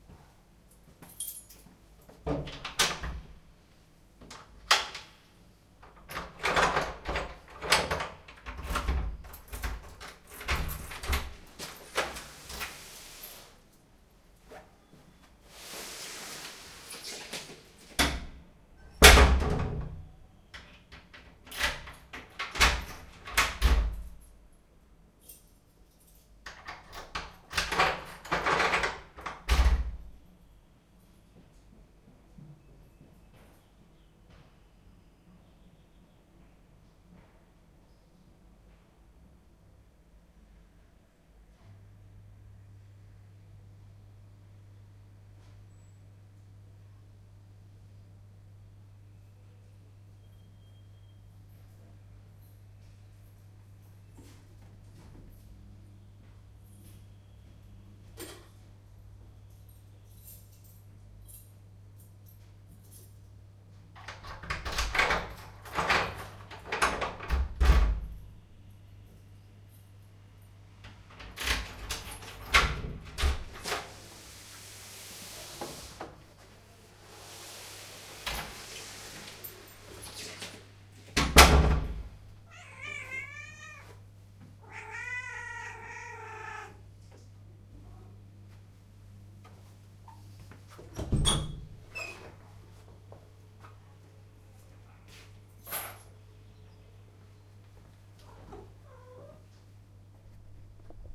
door 02 leaving
Door opening and closing with keys. Recorded with Zoom H2 in stereo.